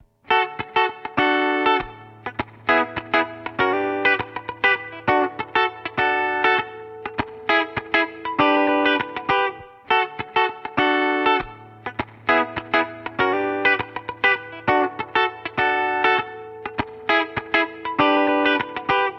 D, E and G
guita, guitar, guitar-chords, rhythm-guitar